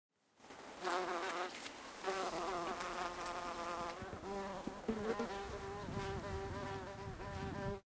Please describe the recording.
Flies (flying insect)
Accidentally recorded flies flying around my XY mobile stereo recorder. Kapesovo, Greece, 2019.